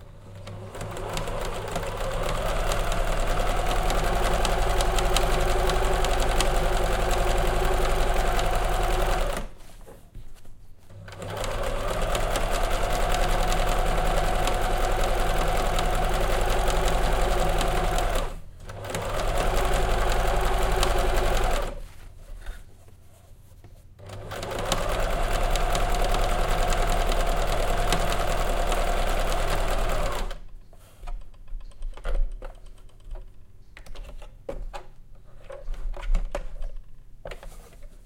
sewing-3runs-1
Recording of a modern sewing machine (brand unknown) sewing three basic zigzag seams. Recorded for Hermann Hiller's performance at MOPE08 performance art festival in Vaasa,Finland.